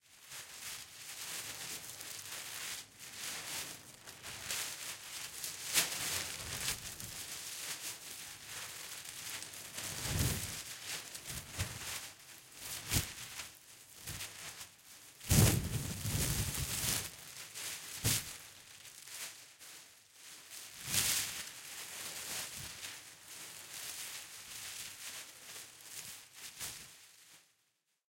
wind blow plastic